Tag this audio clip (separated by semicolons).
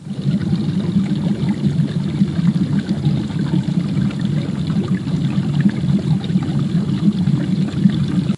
Water; Air; Sea; Bubbles; Deepness